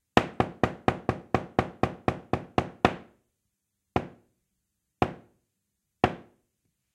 Theatre 3 hits
The 3 hits on wood before the play begins in a theatre.
{"fr":"Théâtre - Les trois coups","desc":"Les trois coups avant le début de la pièce de théâtre.","tags":"bois frapper porte coup planche théâtre trois 3"}
3, door, hit, knock, plank, theatre, three, wood